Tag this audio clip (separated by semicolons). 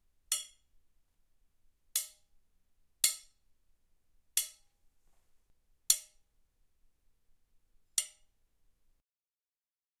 impact; metal; strike